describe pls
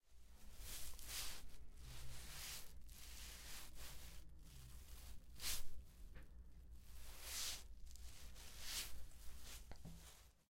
07. Mov. tela con tela
cloth, tul